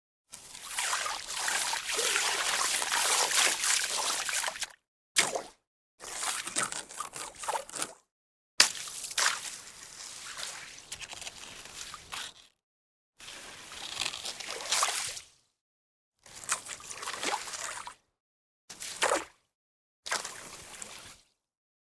Using a stick to splash the slush on top of a frozen pond. The samples are all cut very tightly to eliminate the ambient sound of the park. Recorded using an ME66. Thanks to Carmine McCutcheon.
slush, splash, ice, water